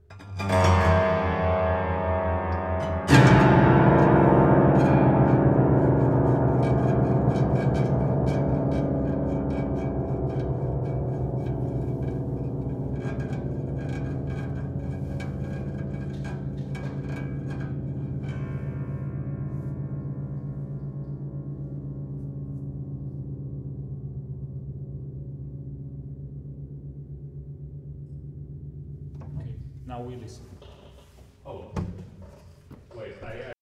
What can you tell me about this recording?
Rösler pianino strings effects
string, pianino, action